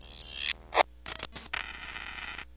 An old drum machine played through a Nord Modular and some pitch shifting effects. Doesn't sound like a drum machine at all.